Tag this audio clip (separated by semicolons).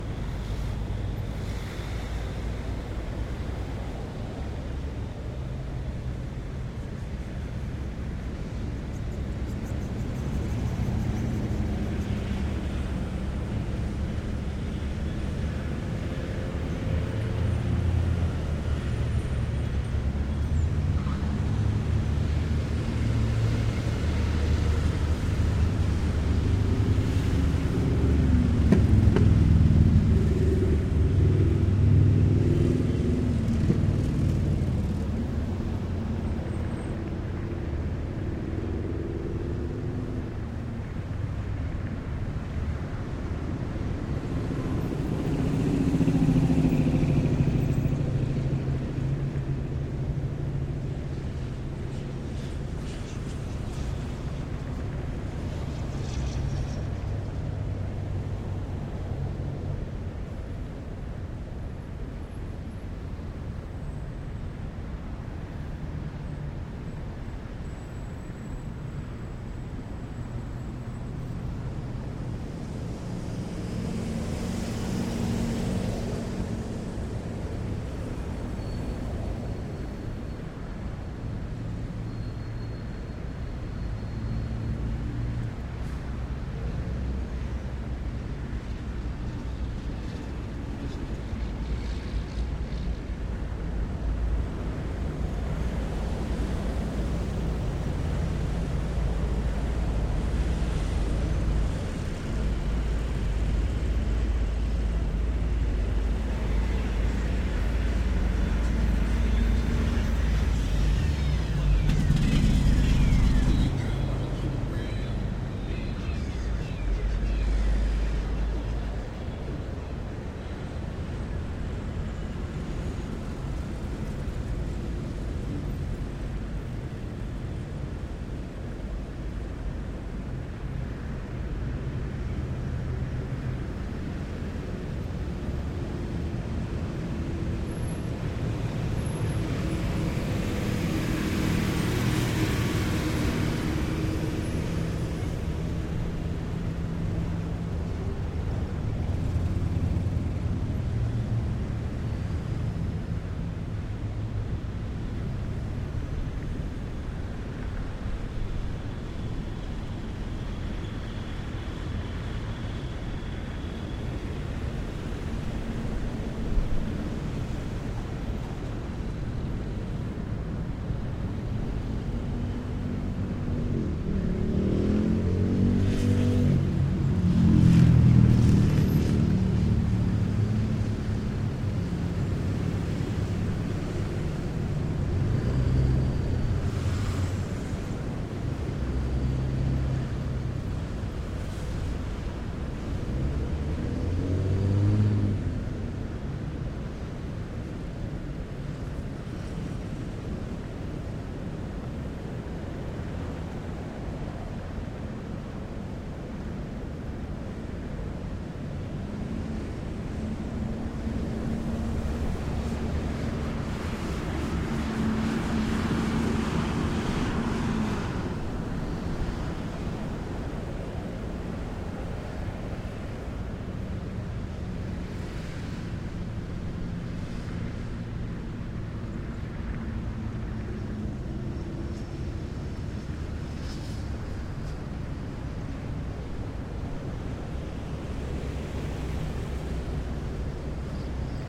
highway; ambient; rush-hour; trucks; cars; road; freeway; brakes; traffic